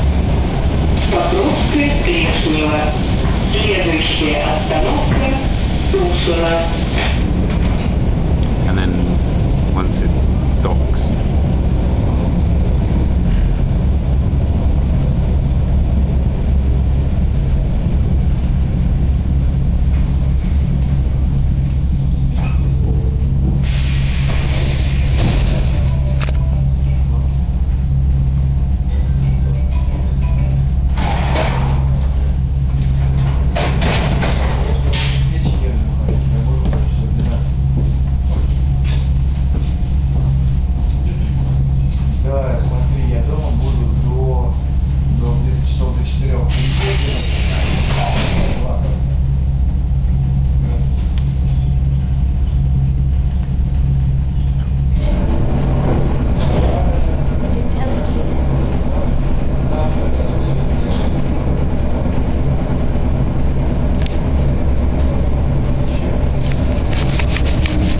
drone and metro announcement
Some drone of the metro and an announcement in Russian.
Recorded in Moscow on a crappy digital camera.